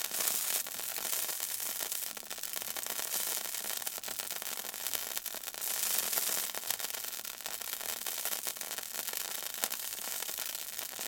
A bit of sauce dripped onto a heated stovetop evaporating